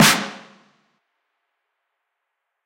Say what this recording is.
a snare sample I made based off a DM5 and a 707 snare sample as a base alongside lots of processing!